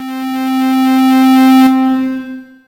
K5005 multisample 01 Sawscape C3
This sample is part of the "K5005 multisample 01 Sawscape" sample pack.
It is a multisample to import into your favorite sampler. It is a patch
based on saw waves with some reverb
on it and can be used as short pad sound unless you loop it of course.
In the sample pack there are 16 samples evenly spread across 5 octaves
(C1 till C6). The note in the sample name (C, E or G#) does indicate
the pitch of the sound. The sound was created with the K5005 ensemble
from the user library of Reaktor. After that normalizing and fades were applied within Cubase SX.